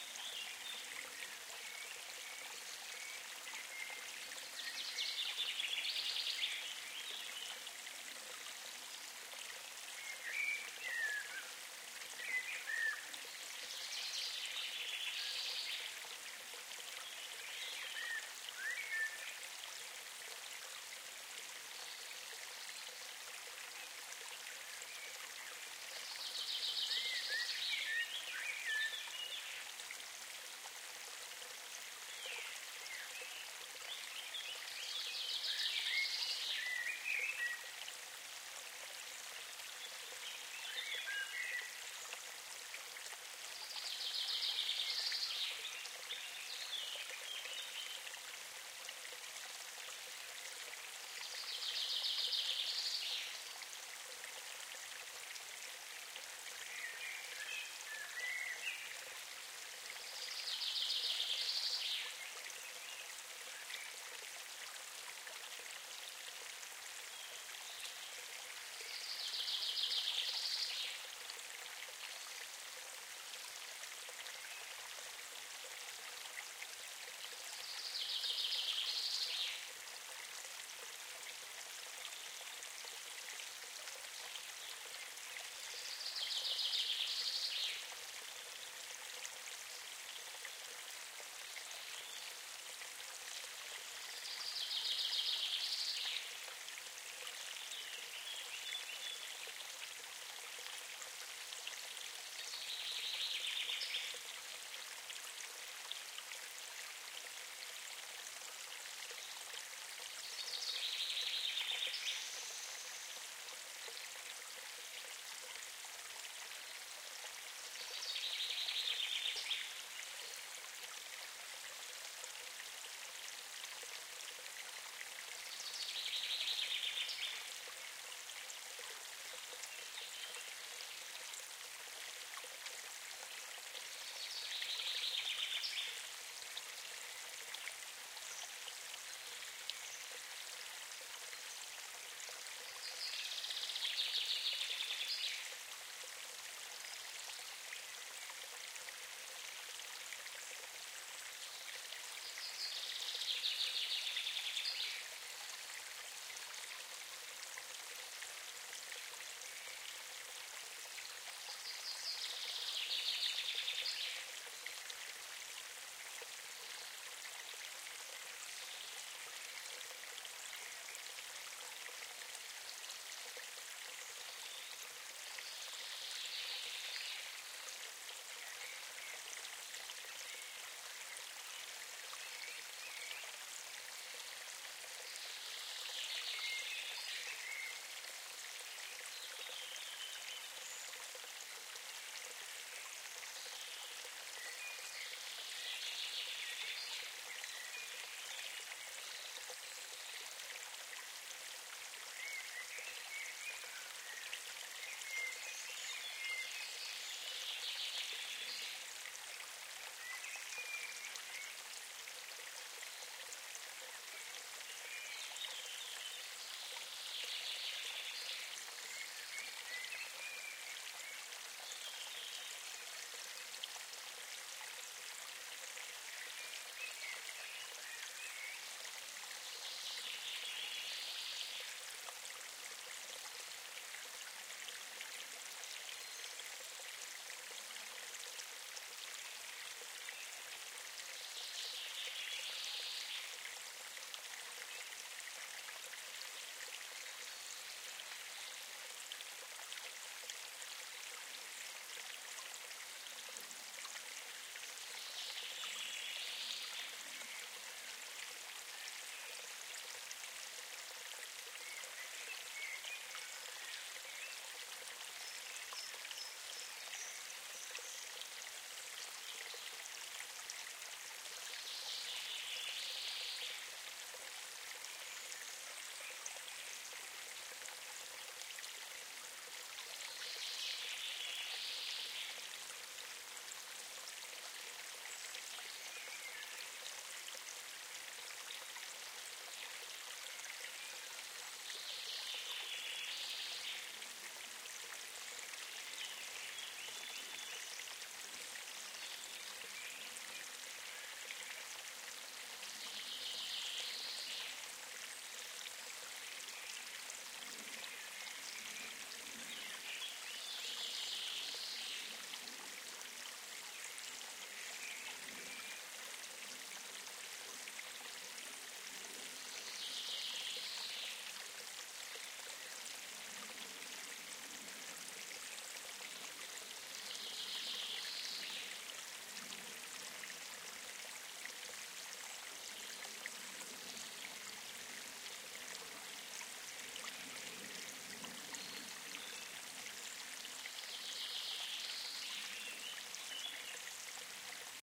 Stream of Water in South of France (10 feet from source)
May 27th 2018 at 3 P.M
This is a stormy day in the South of France, hot and humid.
I set the recorder about 10 feet away from a small stream of water in the woods.
(please check my other sounds for the same version 3 feet from the source)
Technical infos :
recorded with a Tascam DR-40 with two external microphones using a Mid/Side technique.
Mid Mic : Audio Technica AT4041
Side Mic : AKG P420 (fig 8)
MS processing in Audition with a small EQ correction.
Location : Park in Dieulefit (Drôme Provençale) : 44°31’34” N 5°3’12” E
dieulefit, south-of-france, wild, provence, france, forest, nature, field-recording, stream